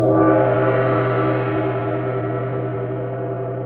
high gong
A higher-register gong strike sample, recorded in the field and later edited and processed